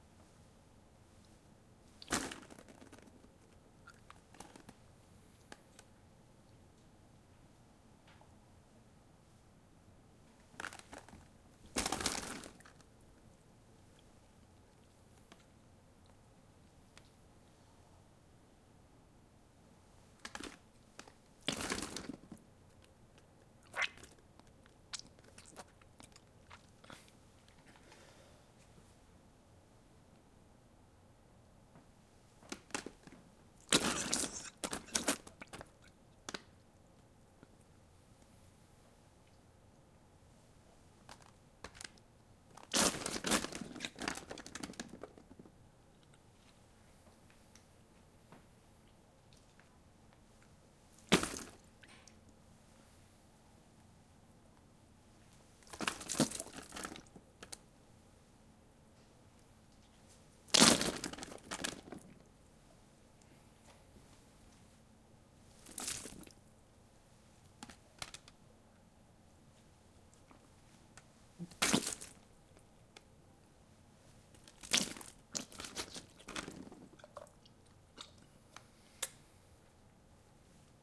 Vomit, puking wet corn rice into garbage trash can
Vomiting, puking wet corn or rice into garbage can